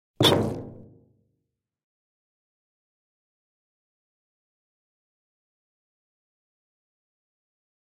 42 hn duckexplodes
Duck explodes. Made with a paper cup, liquid in a bottle and fake duck sound.
explosion, cartoon, duck